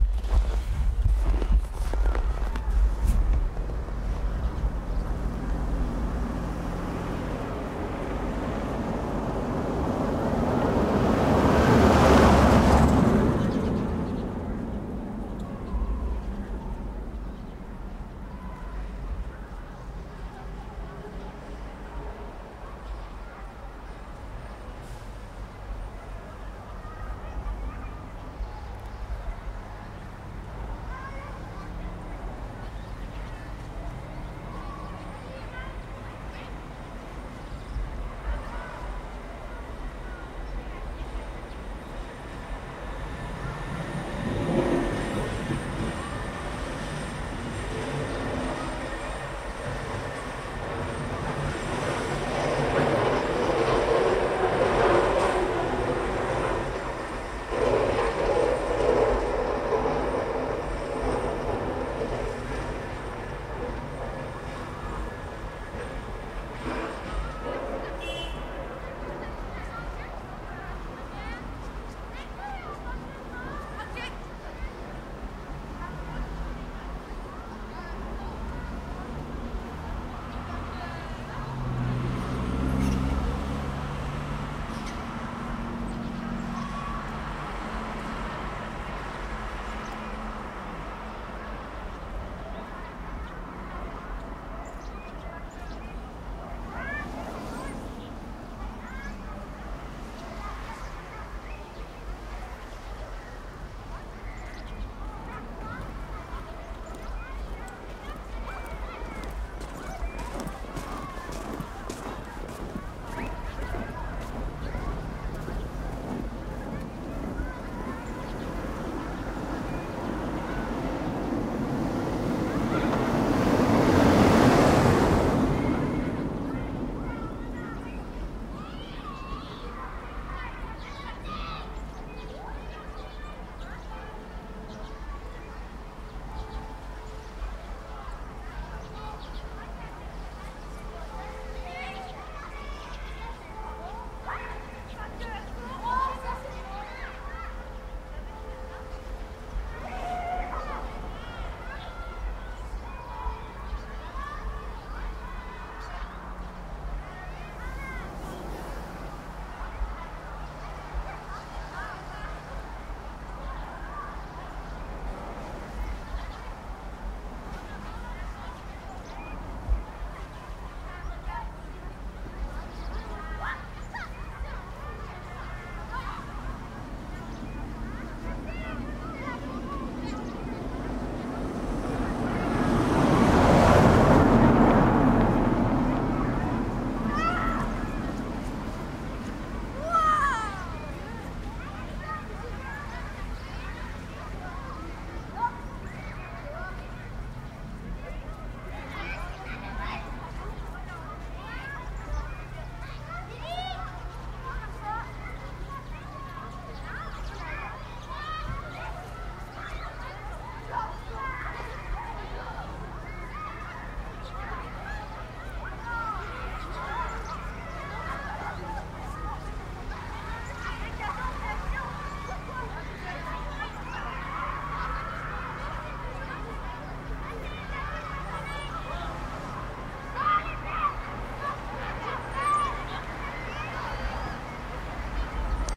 Small town in winter
Sounds of a small town during winter with cars, trucks and a school nearby.
Recorded with a Zoom H6 and a Rode Stereo X on a boompole.
Trois-Rivières, Canada
February 2021
cars, Winter